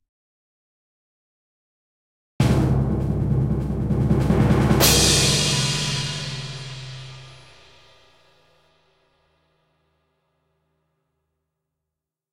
Combined a orchestral snare with a Timpani and a crashing cymbal at the end for the, "Drum roll please..." Great opening for an announcer.